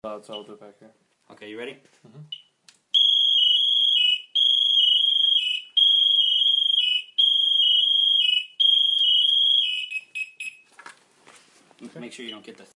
On the SCBA of firefighter's gear, there is a PASS alarm which is an emergency device that can be set off in situations of helplessness. When set off, it makes an extremely loud alarm sound.